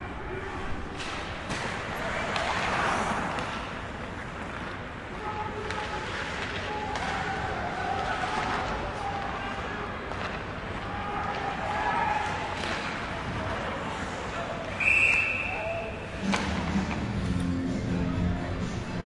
09-IceHockey-effecten-en fluit
Recorded match in the Uithof, the hague, icehockey match
Hockey, Icehockey, contest, ice, iceskating, match, puck, skating, teams